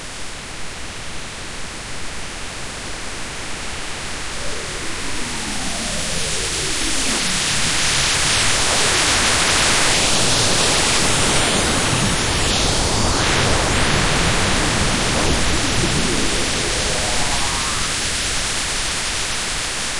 The air is alive with the buzz of weird technology and energy.
Created by running an image through audiopaint
machine, noise, space, science-fiction, artificial, sci-fi, galaxy, alien, ambience, background, spaceship, audiopaint